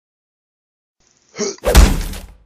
HammerAttack game voice for rpg for rpg games.
attack, fighting, game, rpg, sound